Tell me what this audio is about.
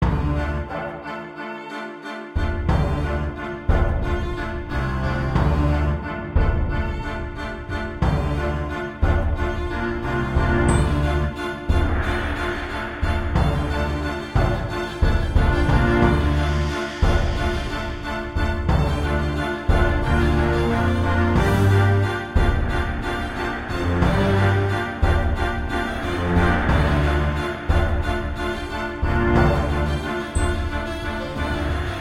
Loop Pirates Ahoy 02
A music loop to be used in fast paced games with tons of action for creating an adrenaline rush and somewhat adaptive musical experience.
battle, game, gamedev, gamedeveloping, games, gaming, indiedev, indiegamedev, loop, music, music-loop, victory, videogame, Video-Game, videogames, war